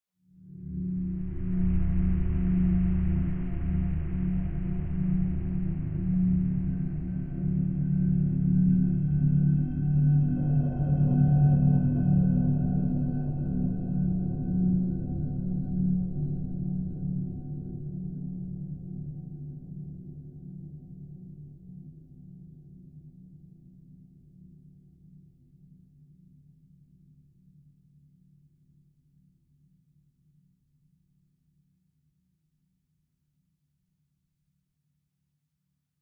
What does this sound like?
A random stab, good for creepy games